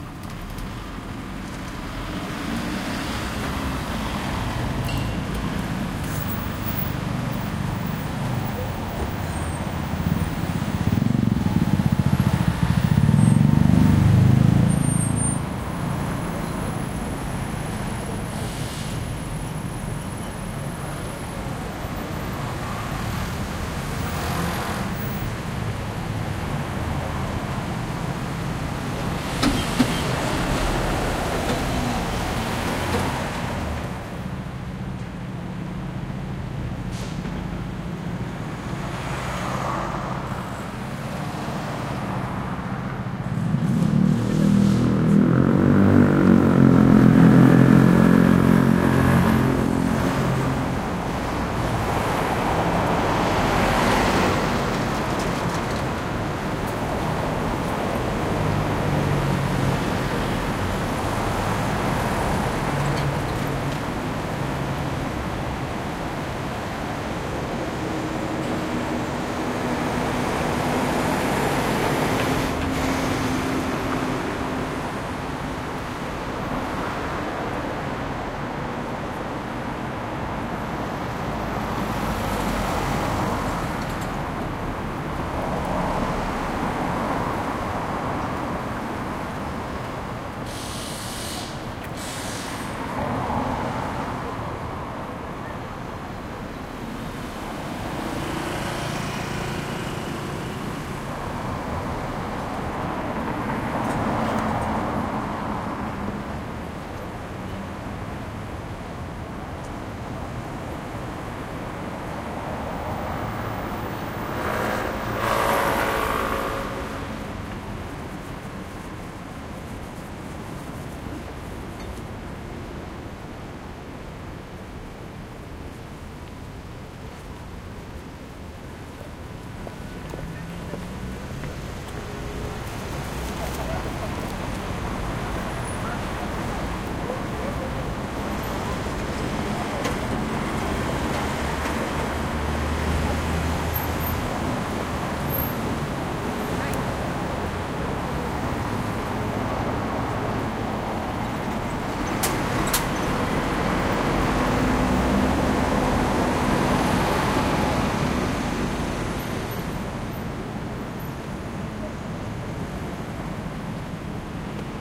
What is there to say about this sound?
Japan Tokyo Street Cars Construction City Evening Night
One of the many field-recordings I made Tokyo. October 2016. Most were made during evening or night time. Please browse this pack to listen to more recordings.
car, cars, city, construction, drills, engine, engines, evening, field-recording, Japan, japanese, metropolitan, motor, motorbike, motorcycle, night, outdoors, outside, street, Tokyo, traffic